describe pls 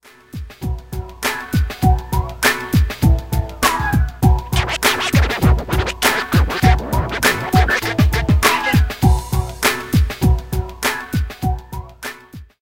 This is just an example to show how that scratch sample sounds with a beat.